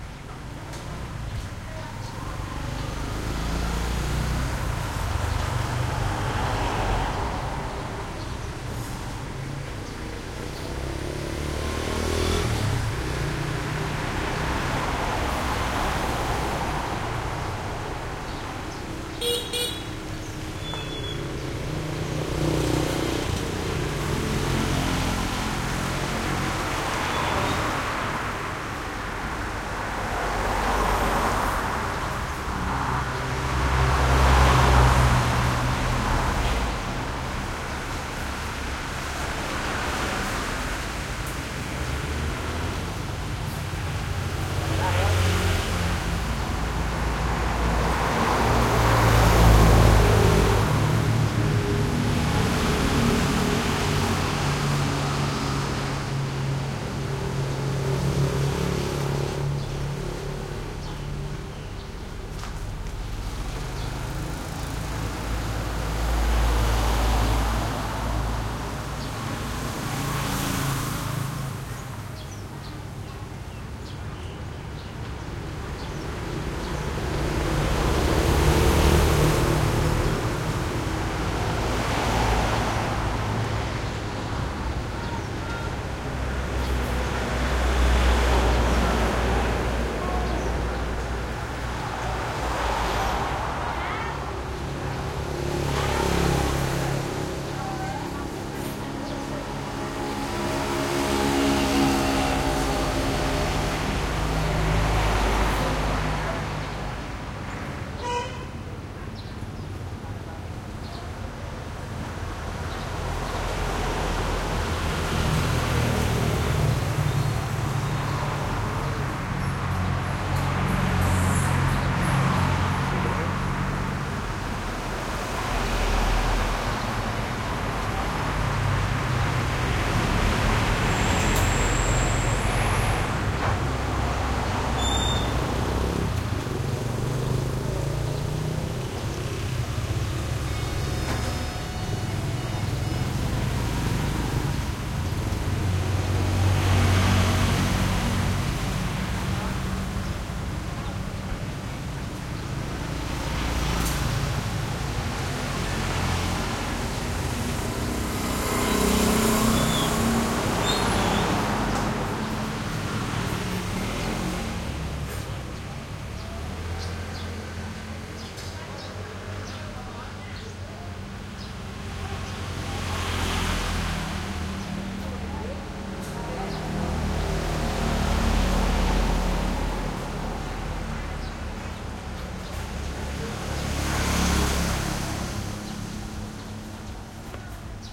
Thailand traffic medium busy cu town street with occasional bg voices slight echo